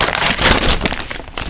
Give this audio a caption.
ripping a paper bag